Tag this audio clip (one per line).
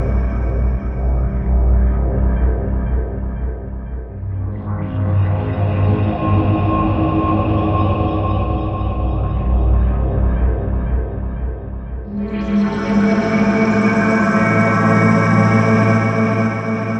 ambient
dark
eerie